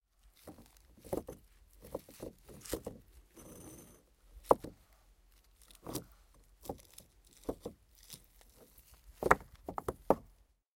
Handling rocks
An old stone wall in the forest had a bunch of loose rocks, so I wanted to record me handling, shifting the rocks. The sounds that resulted were very interesting to me.
Nature, ambience, rock, thump, rocks, field-recording, stones, stone, rumble